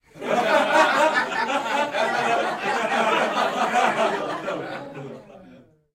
Recorded inside with a group of about 15 people.
adults, audience, chuckle, fun, funny, haha, laugh, laughing, laughter, live, theatre